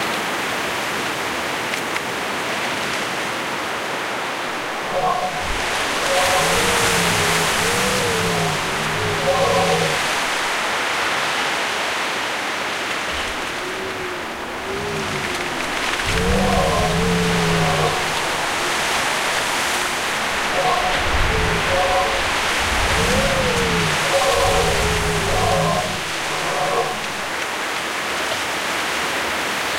Windscape With Metal Scrape
The title says it all.
howl, loop, metal, request, scrape, stereo, wind